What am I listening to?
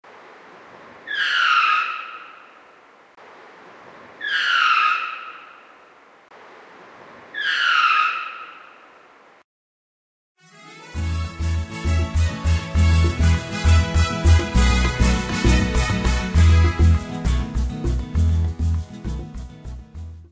CAR BRAKING
Listen the car breaking